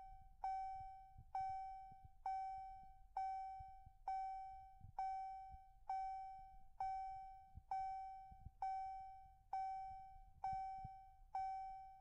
car lights ding
The noise a car (Hyundai Getz) makes when the door is open with the lights left on. Recorded with a Sennheiser e609 Silver Dynamic Microphone
field-recording, traffic, auto, road, cars, lights, city, open-door, headlights, car, street, ding, ambience, noise